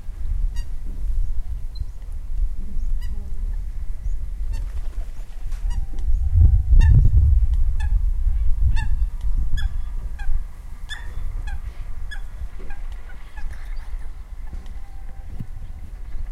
Birds are heard playing with the water and wind. Recorded with a Zoom h1 recorder.